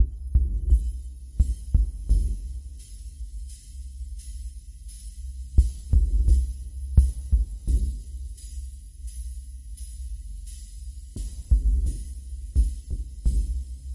stk sound design,open hats with sub from albino
deep, ambient